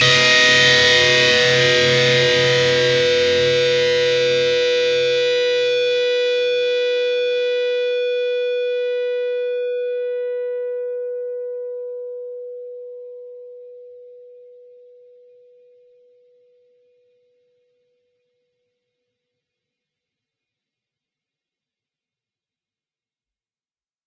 Dist Chr Bmj 2strs 12th up
Fretted 12th fret on the B (2nd) string and the 11th fret on the E (1st) string. Up strum.
chords, distorted, distorted-guitar, distortion, guitar, guitar-chords, lead, lead-guitar